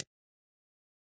Click 01 single 2015-06-21
a sound for a user interface in a game
click, game, user-interface, videogam